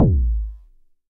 Just some hand-made analog modular kick drums